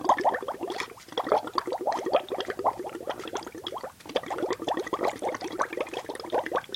Blowing bubbles into a cup of water through a cheap plastic straw.